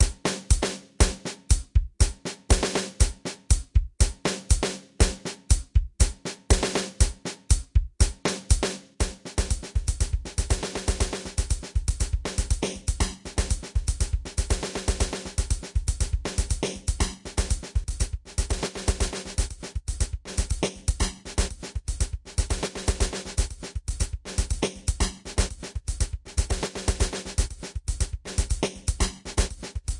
Gated Drums 004 echo before gate
gated, effect, gated-drums, delay, drums, vst, processed, gate, echo